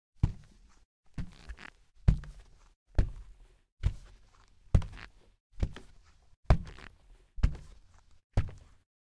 climbing a short wooden staircase (carpeted), wearing sneakers.
EM172 (on shoes)-> Battery Box-> PCM M10.